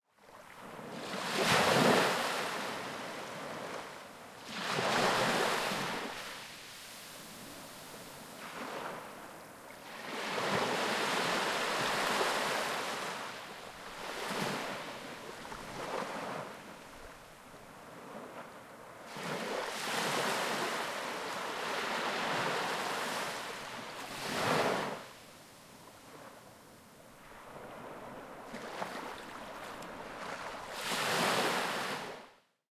Ocean beach at night with small waves
Ocean with small waves at night.
Recorded near Palma, Mallorca.
field-recording, fieldrecording, shore, waves